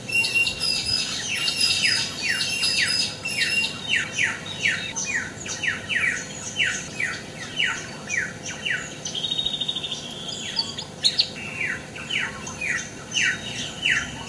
6am the sun is rising in October. The days are hot and storms are gathering.